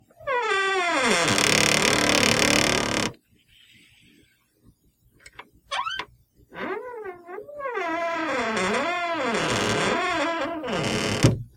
Door-Wooden-Squeak-0010
This is the sound of a common household door squeaking as it is being opened or closed.
This file has been normalized and most of the background noise removed. No other processing has been done.
Squeak, Creak, Door, Groan, Wooden